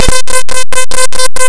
Imported as raw into audacity.